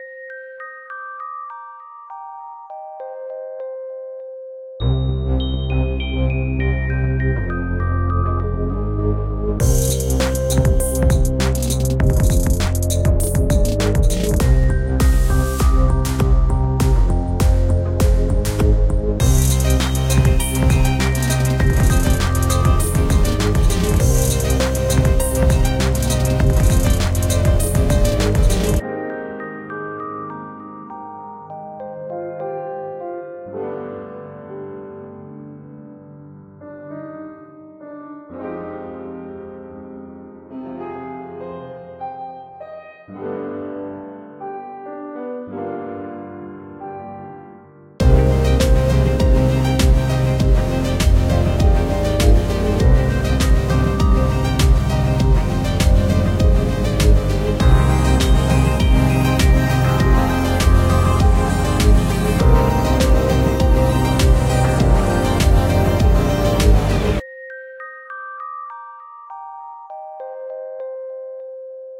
Deep Space Garage Band
ambient, cyberpunk, deep, garage-band, garageband, mysterious, techno